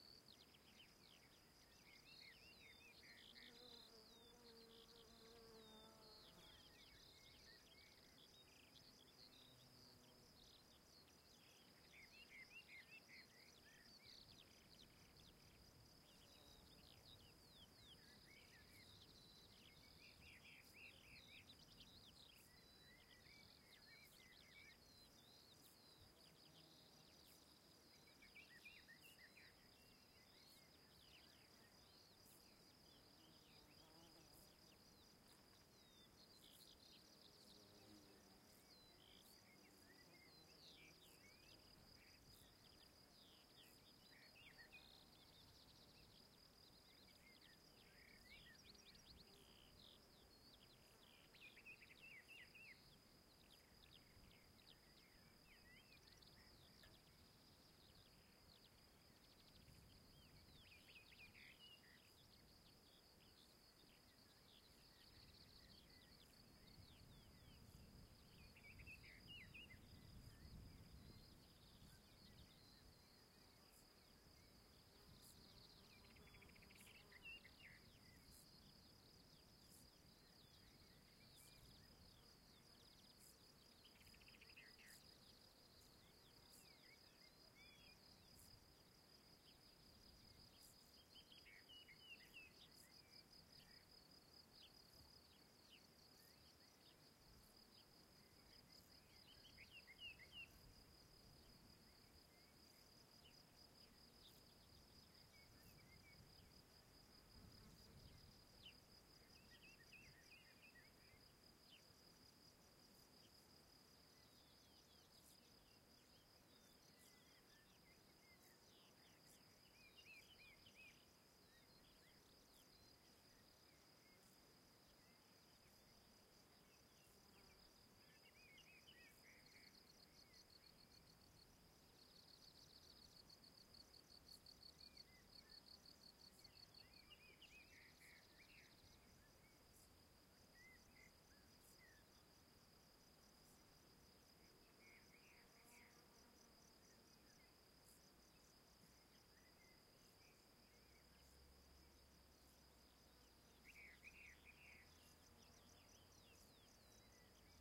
countryside birds insects
Larzac plateau during the summer. In the steppe some birds and insects